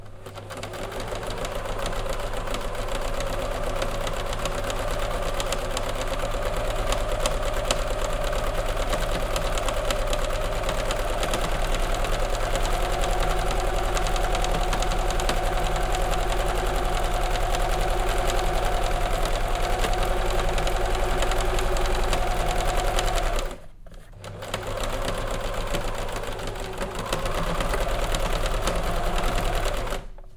sewing-longrun-1
Recording of a modern sewing machine (brand unknown) sewing one basic zigzag seam. Recorded for Hermann Hiller's performance at MOPE08 performance art festival in Vaasa,Finland.
accelerating, field-recording, one-seam, sewing, sewing-machine, zigzag